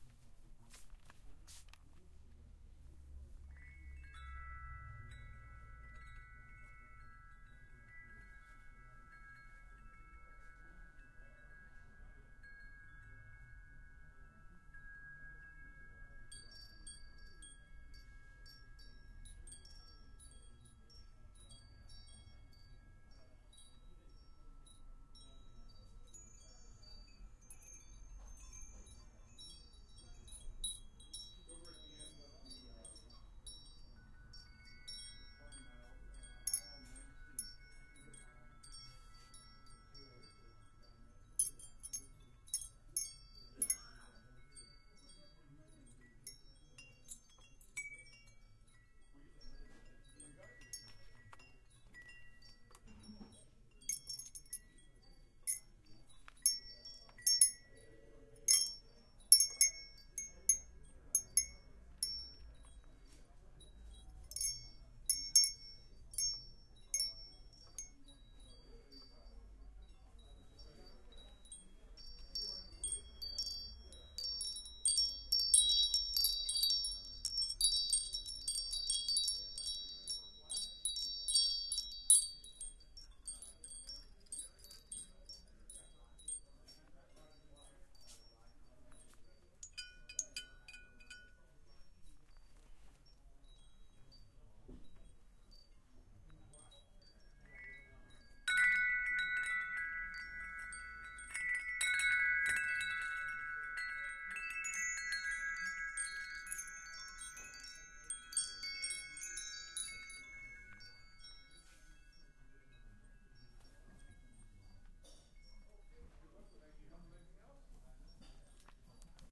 one in a series of recordings taken at a hardware store in palo alto.